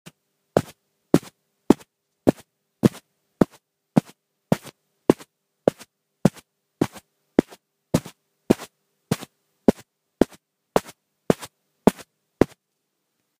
Foosteps sounds, recorded from iphone 5 and edited in Audacity.